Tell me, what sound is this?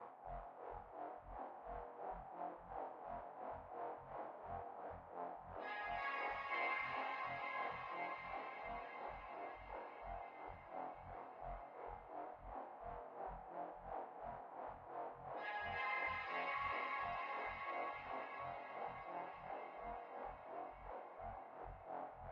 stk sound design, massive vst
noise, ambient, deep